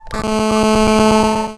mobile interference
sound produced by the electromagnetic interference that occurs when you have the mobile phone close to the headphone.
phone, UPF-CS13, interference, electromagnetic, mobile, campus-upf